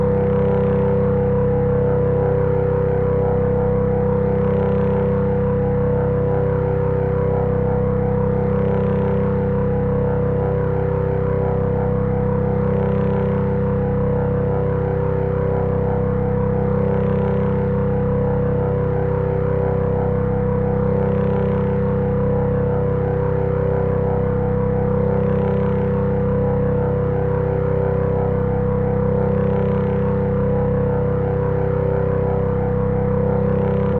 A deep ambient drone created with Nord Modular synth.
sound-design, hum, deep, ambient, peaceful, digital, pad, synth, swell, nord, drone, low